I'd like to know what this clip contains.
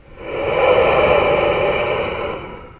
Ominous breathe
Just the sound of some ominous breathing. Recorded using Audacity and a Turtle Beach Earforce PX22 headset microphone.